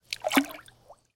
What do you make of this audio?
Raw audio of swirling water with my hands in a swimming pool. The recorder was placed about 15cm away from the swirls.
An example of how you might credit is by putting this in the description/credits:
The sound was recorded using a "H1 Zoom recorder" on 1st August 2017.